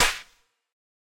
made in zynaddsubfx processed in audacity
clap, electro, house